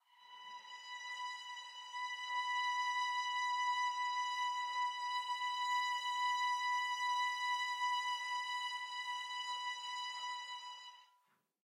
One-shot from Versilian Studios Chamber Orchestra 2: Community Edition sampling project.
Instrument family: Strings
Instrument: Violin Section
Articulation: vibrato sustain
Note: B5
Midi note: 83
Midi velocity (center): 63
Microphone: 2x Rode NT1-A spaced pair, Royer R-101 close
Performer: Lily Lyons, Meitar Forkosh, Brendan Klippel, Sadie Currey, Rosy Timms